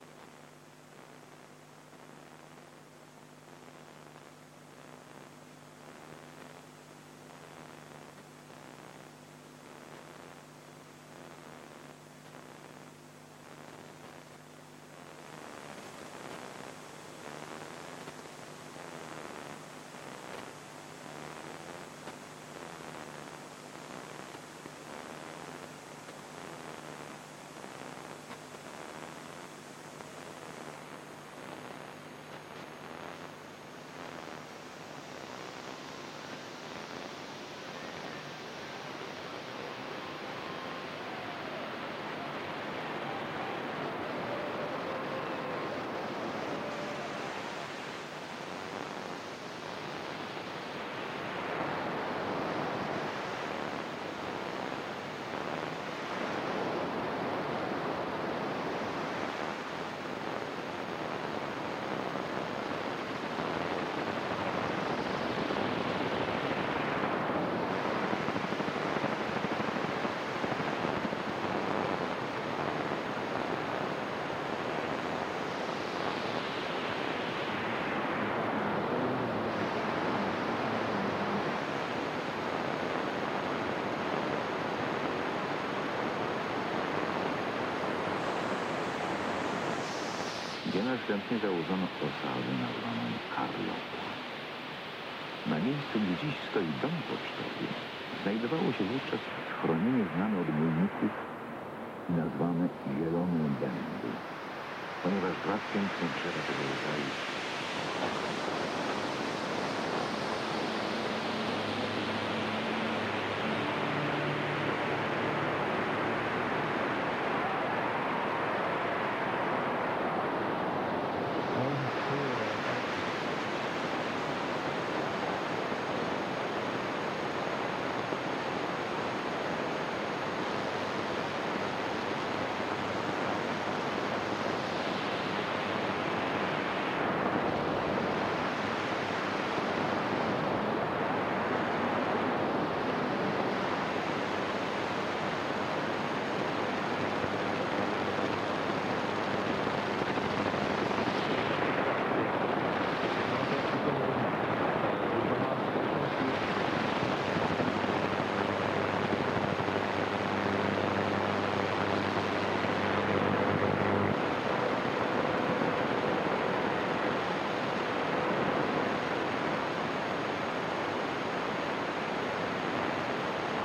Radio noise and static
I've scanned the radio frequencies with an old radio and recorded with my Tascam DR-05 via the headphone jack. You can hear some weird looping patterns and sound fragments from random radio stations.
buzz, random